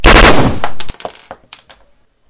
This is a self-made recording of an M16 firing in it's burst mode.